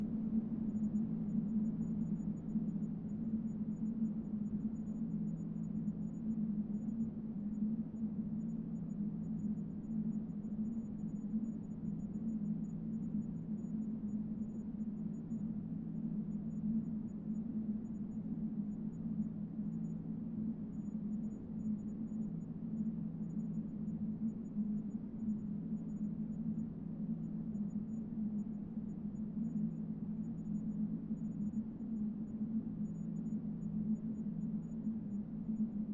Light Synthetic Wind Noise
Soft noise emulating wind, a mechanical fan, a/c, etc.